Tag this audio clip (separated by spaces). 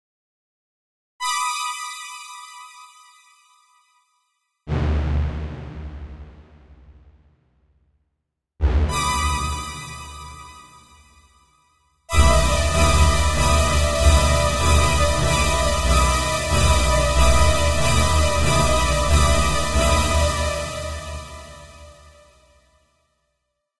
psycho attack scare dramatic stab jump-out startle film cinematic movie-surprise horror stabbing-music strings jump jump-scare